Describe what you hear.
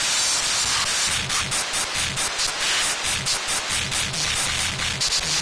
DD 20 Loop 2
beats, crunched, glitch, processed, maching